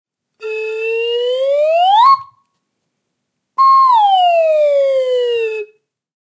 SLIDE WHISTLE - 1
Sound of slide whistle (up and down). Sound recorded with a ZOOM H4N Pro.
Son d’une flûte à coulisse (montée et descente). Son enregistré avec un ZOOM H4N Pro.
cartoon, slide, brass-instrument, slide-whistle, whistle, brass, up, down, up-and-down, children, instrument, chil